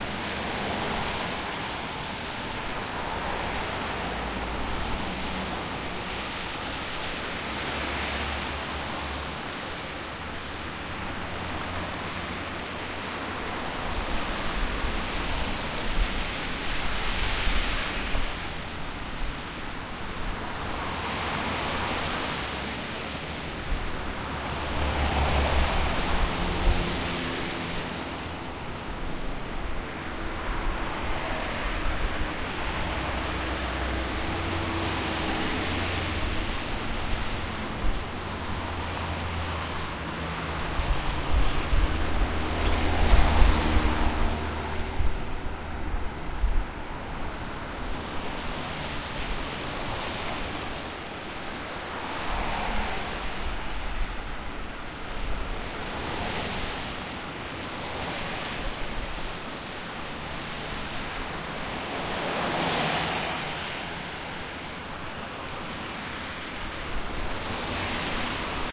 сars driving dirt 1
Cars driving on a dirt. Early spring. Oktyabrskiy bridge.
Recorded 31-03-2013.
XY-stereo.
Tascam DR-40, deadcat.
city, dirt, rumble, slush